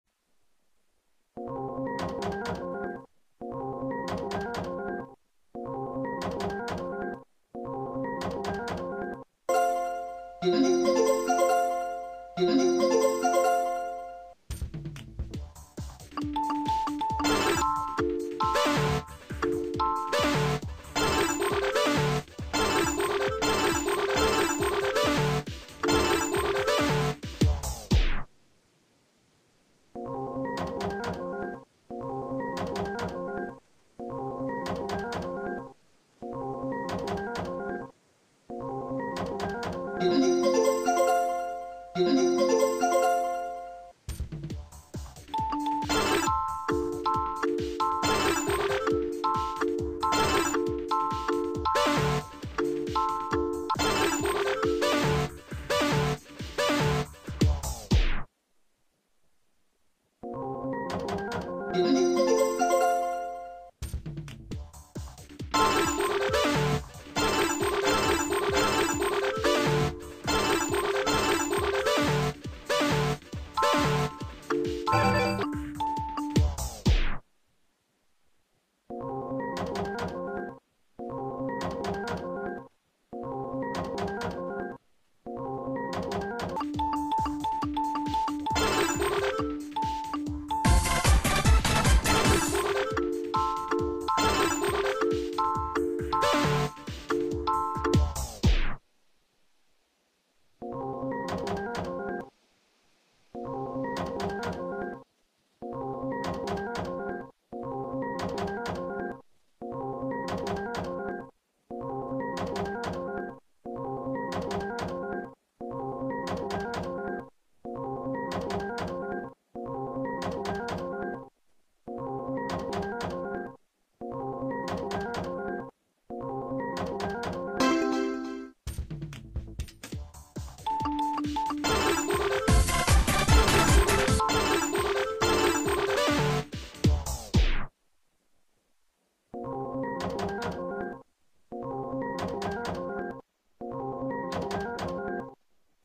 Spielautomaten Sound
Slots, Sound, One